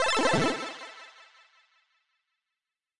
Synth chiptune 8 bit ui interface 1
Synth, interface, chiptune, ui, bit, 8